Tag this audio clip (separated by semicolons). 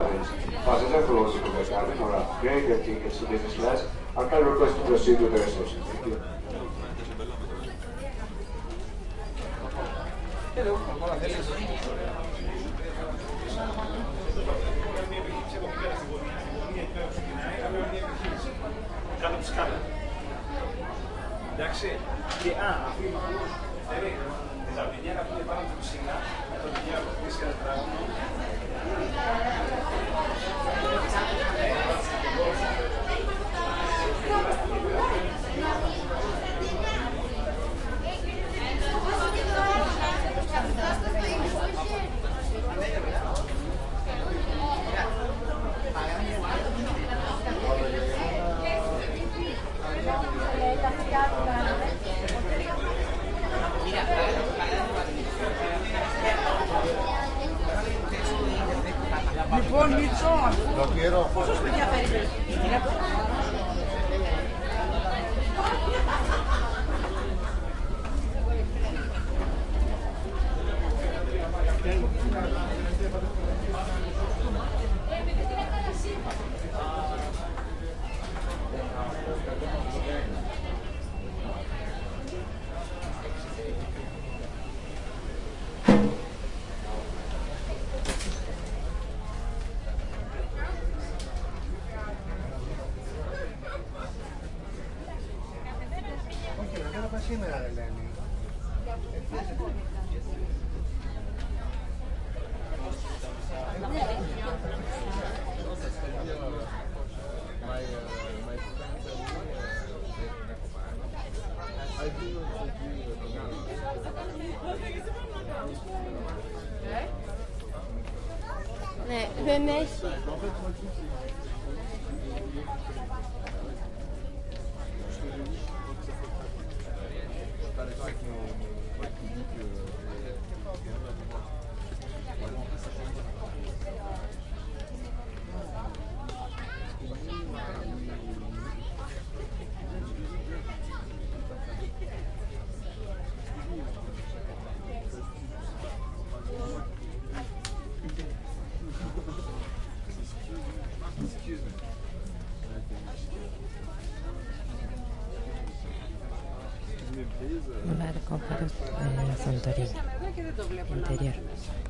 ship; inside; Ambiance; Greece